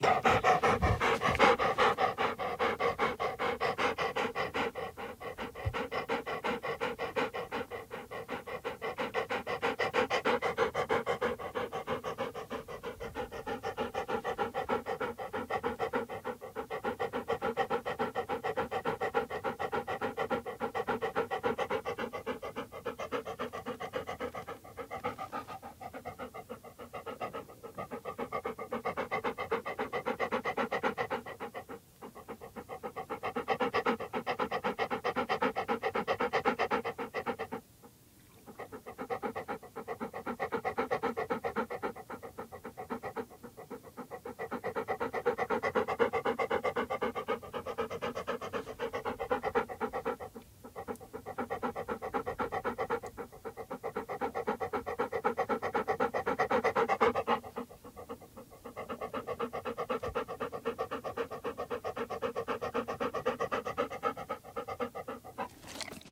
Golden retriever Lab, Studio recording